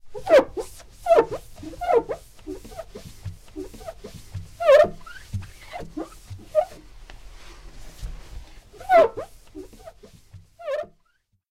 Squeaky window cleaner
Cleaning the vocal booth window with a damp duster. Recorded with a Neumann U87.
Windowcleaner, Squeegee